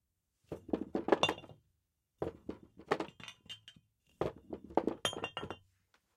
glass bottle rolling on wooden floor
rolling, bottle, glass, floor, wooden